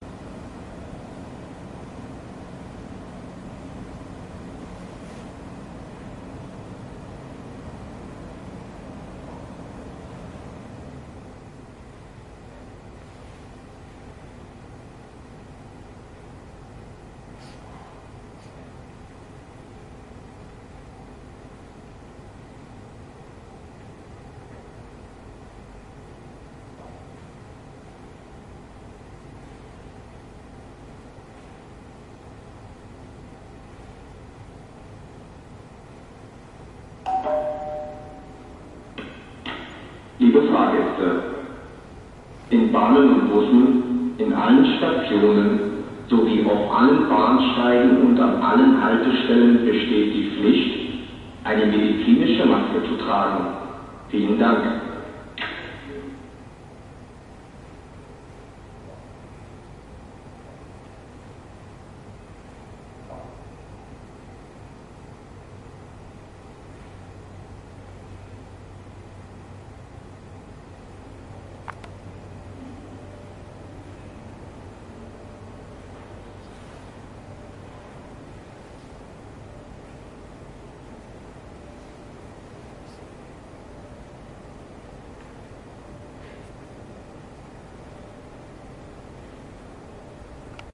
S-Bahn-Station-leisesRauschen-DongCorona-Durchsage
Empty city train station, in the middle comes an announcement about Corona measures, signaled by a ding-dong.
covid-19, loudspeaker, quiet, station, subway